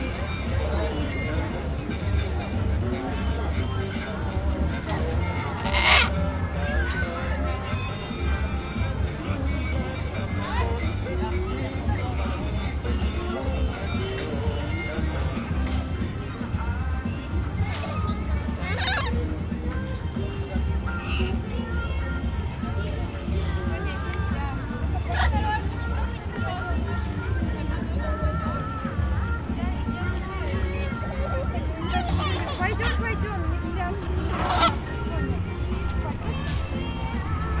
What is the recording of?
Sounds in the recreation park of the 30th anniversary of the Komsomol. Adults with children. Voices of children. Loud music. Noise. Voice of parrots (they are present to making photographs)
Recorded: 2013-08-17.
XY-stereo.
Recorder: Tsacam DR-40